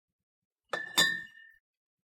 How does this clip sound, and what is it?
Sound of shoping in litle store. ( crackles....)